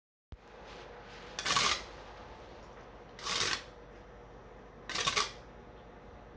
Grater slide
Sliding up and down a cheese grater with a spoon
Cheese, Slide, Grater